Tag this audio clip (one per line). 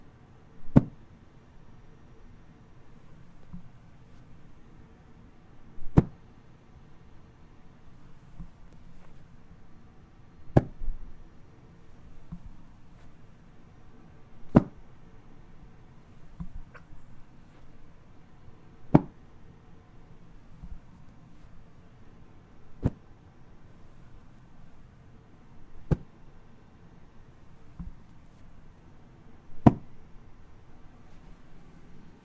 thumps
thud
plop
thump
fall